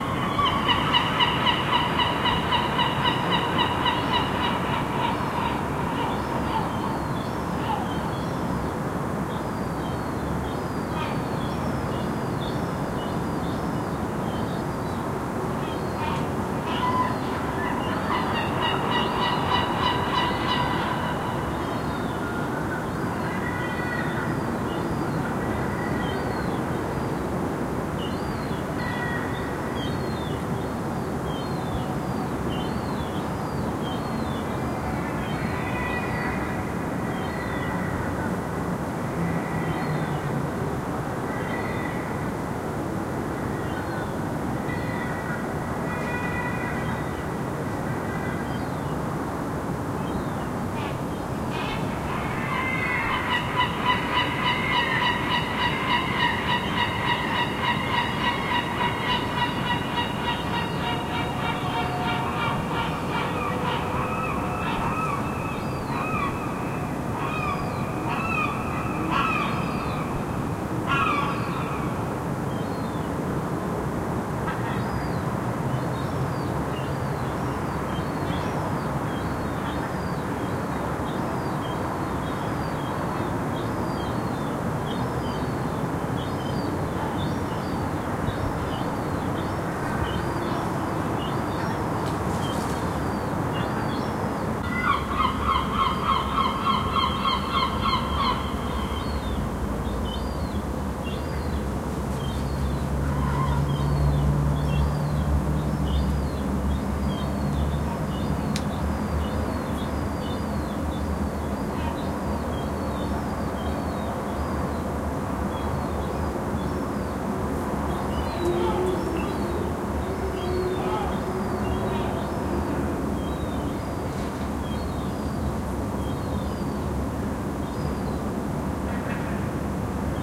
the sounds of seagulls in the city
birds, city, gulls, morning, nature, noise